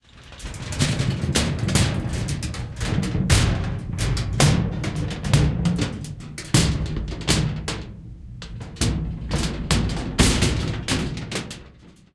Aluminum Foil Sounds 3
This is me stretching a small sheet of aluminum foil way too close to a Zoom H4n.